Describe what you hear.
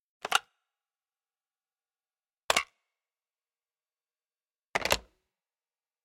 phone pickup hangup
recorded with Sony PCM-D50, Tascam DAP1 DAT with AT835 stereo mic, or Zoom H2